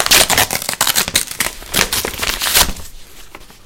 ripping a paper bag
bag, paper, rip, tear